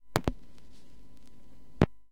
Vinyl Record, On Off, B
Raw audio of placing the needle of a record player onto vinyl, then taking it off a second later.
An example of how you might credit is by putting this in the description/credits:
The sound was recorded using the "EZ Vinyl/Tape Converter" software on 24th March 2018.
needle, player, On, lift, vinyl, off, record, turntable